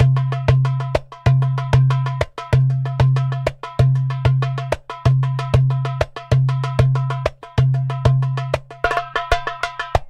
Rpeople Percussion4
Hand Percussion 4
rhythms, hand-percussion